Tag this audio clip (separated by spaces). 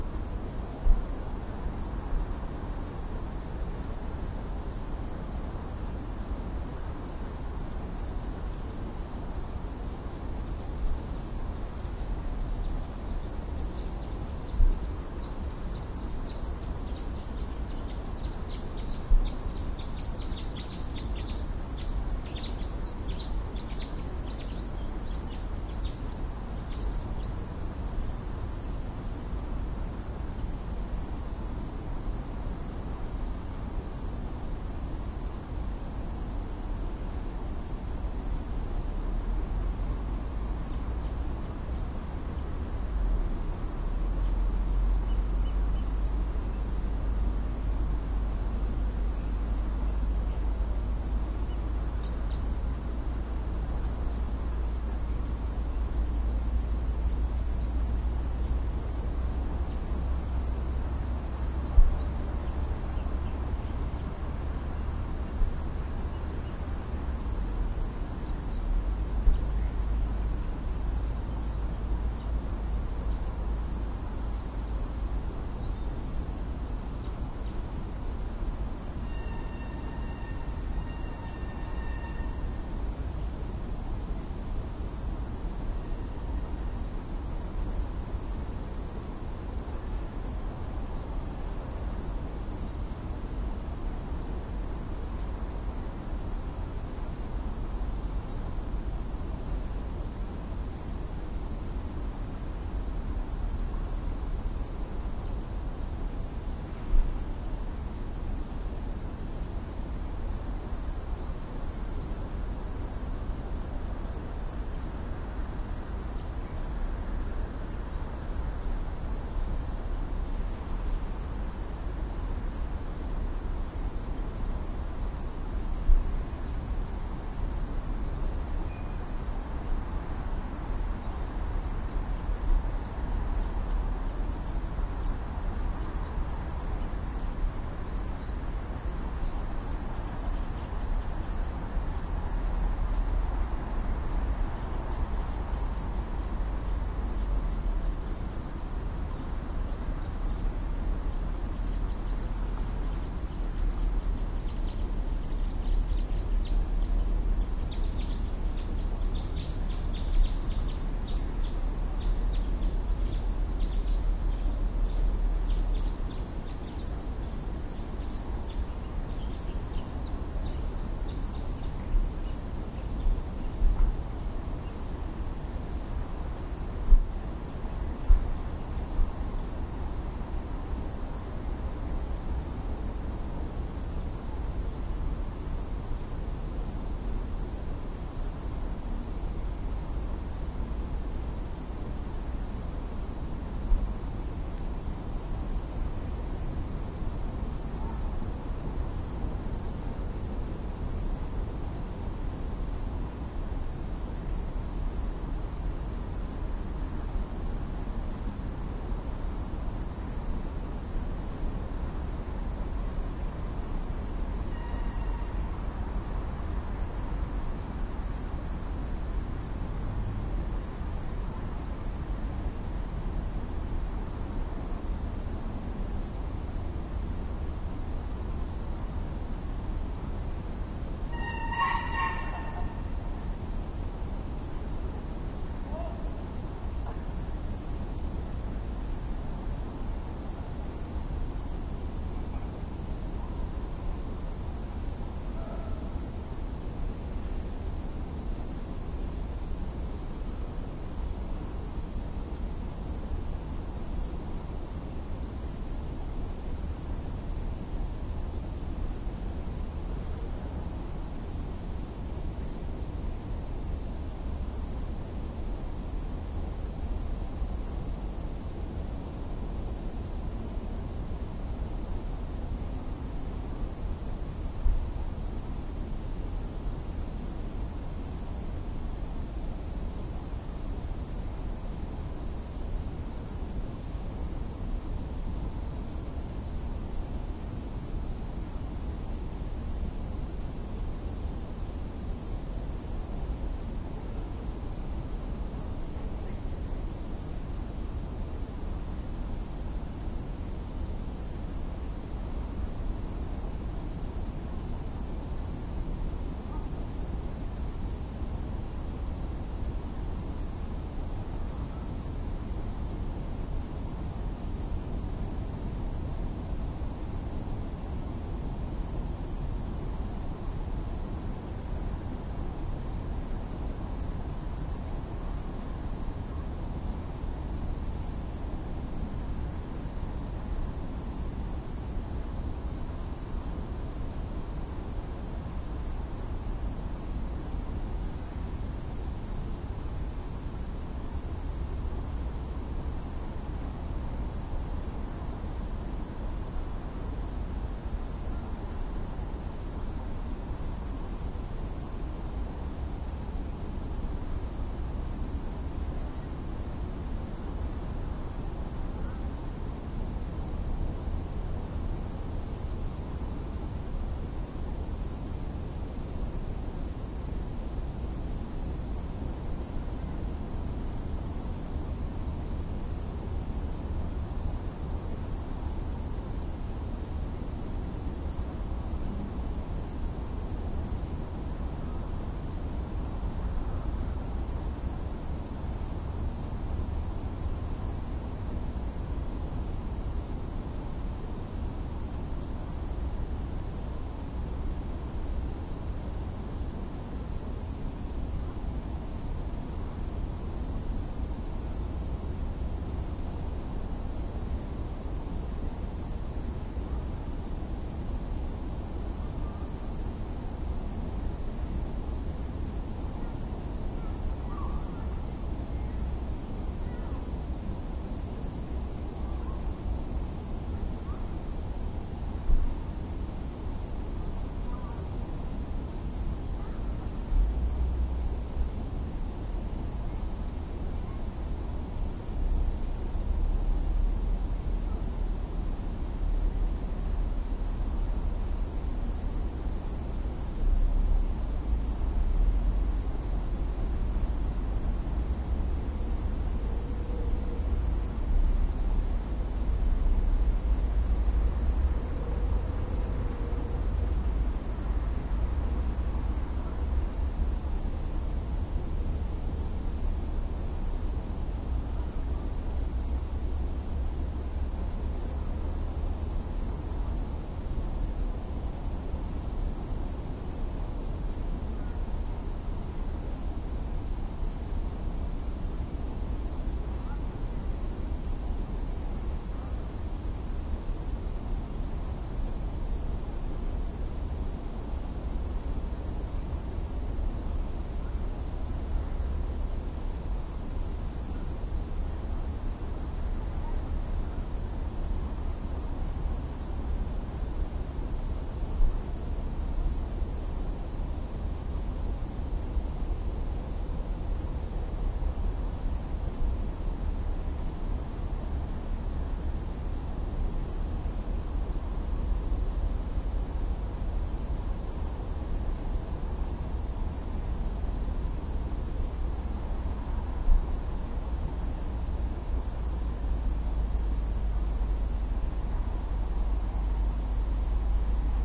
ambience beach bicycle birds forest nature waves